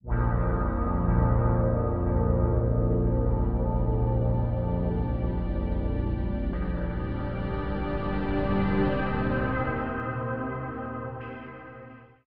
Layered pads for your sampler.Ambient, lounge, downbeat, electronica, chillout.Tempo aprox :90 bpm